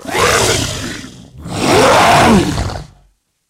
A monster voice sound to be used in horror games. Useful for all kind of medium sized monsters and other evil creatures.
epic, fantasy, fear, frightening, frightful, game, gamedev, gamedeveloping, games, gaming, horror, indiedev, indiegamedev, monster, rpg, scary, sfx, terrifying, video-game, videogames, voice